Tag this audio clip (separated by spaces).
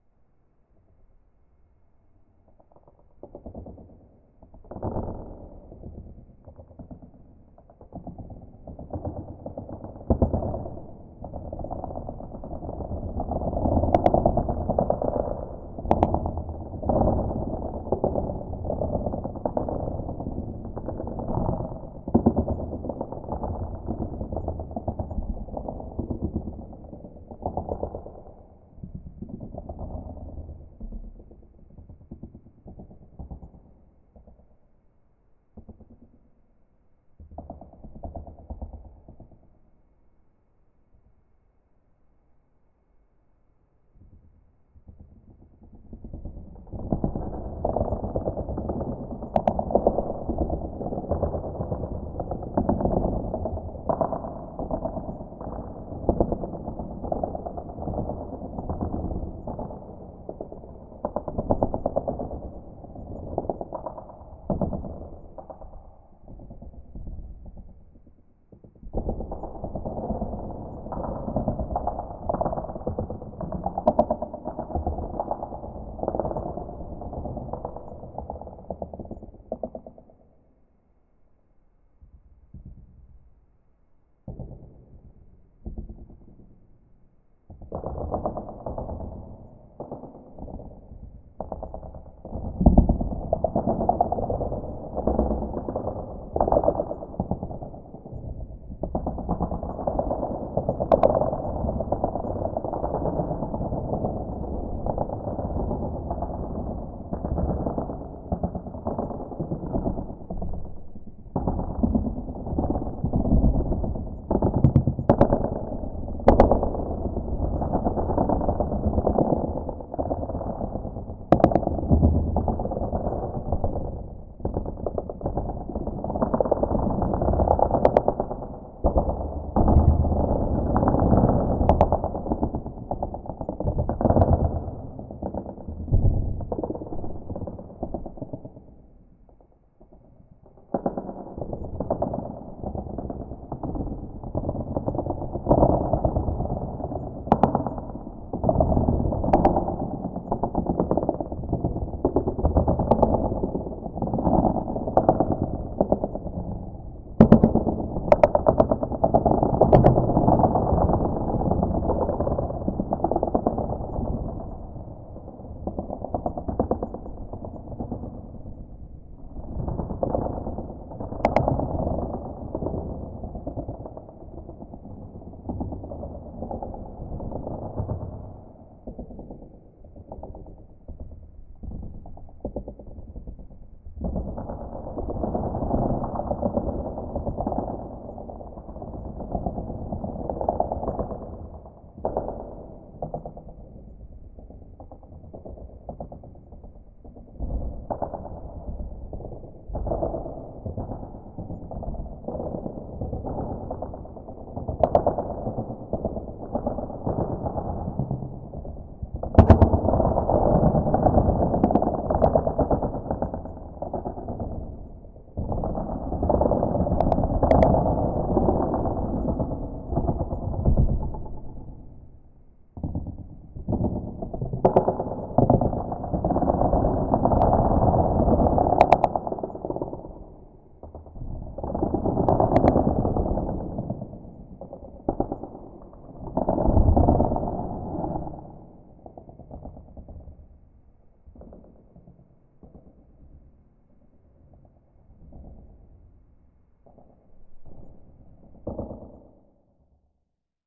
bass cookie crack cracking crackling crunch crunching earthquake random scrunch